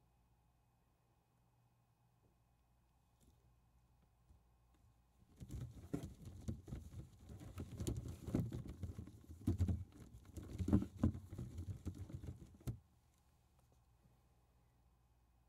Stirring Baseballs Thump bump movement